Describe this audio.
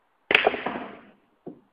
pool-ball-strike
This is the initial sound of the cue ball striking a number 9 ball. Recorded from my Nokia 6125i cell phone.